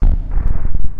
The sample is an industrial loop that can be used at 120 BPM. It has some weird noises in it.